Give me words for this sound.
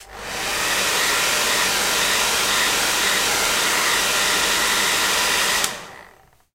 hairdryer popshield
Plastic hairdryer, recorded with pop shield.
appliance, bathroom, blowdryer, dryer, hair, hairdryer, plastic